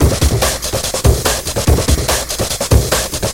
Hardbass
Hardstyle
Loops
140 BPM
140, BPM, Hardbass, Hardstyle, Loops